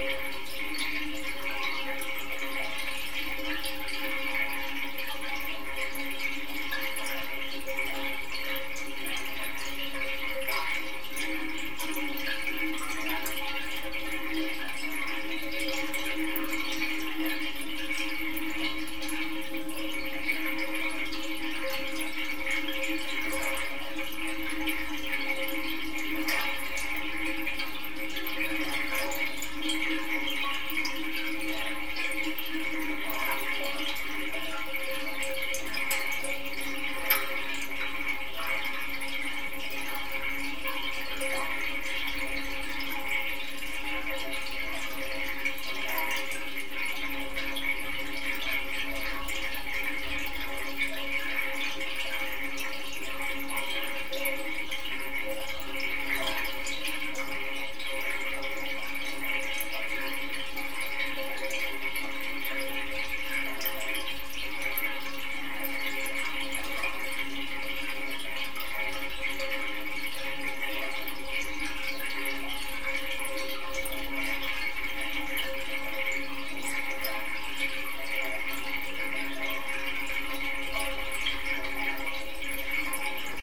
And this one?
Steam radiator in bedroom gurgles and drips with the hot water inside.
drip, gurgling, heater, heating, iron, metal, radiator, water